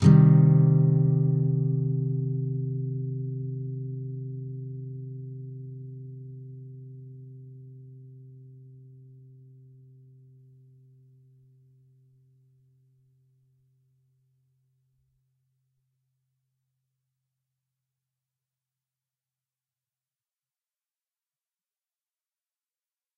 C minor E (6th) string 8th fret, A (5th) string 6th fret, D (4th) string 5th fret. If any of these samples have any errors or faults, please tell me.